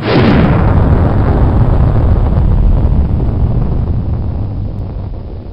Explosion sound created at work with only the windows sound recorder and a virtual avalanche creation Java applet by overlapping and applying rudimentary effects. Higher pitch.
noisy, explosion